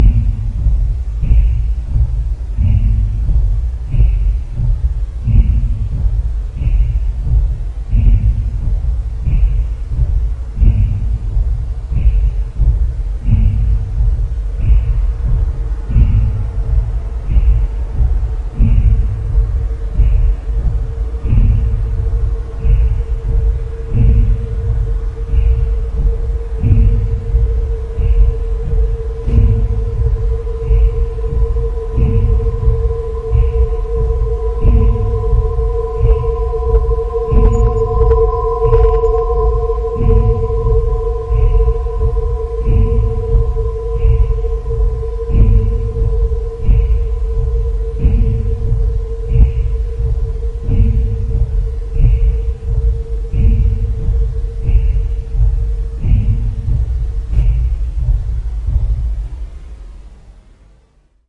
Shadow Maker-Stairs
What you hear is the sound of an old mystic Engine, or something else, i don´t know. it haunts this old house for almost ten years. Will you discover the truth of tze old Stairs leading up on the next floor? I made it with Audacity. Use it if you want, you don´t have to ask me to. But i would be nice if you tell me, That you used it in something.